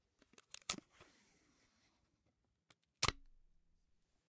Pulling, then tearing off a piece of Scotch Tape.